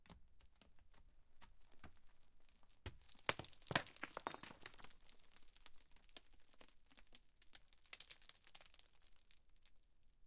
SFX Stone Calcit DeadSea Avalance foot #5-180
some small and large stones falling down a hill, very glassy sound
rocks, stone, falling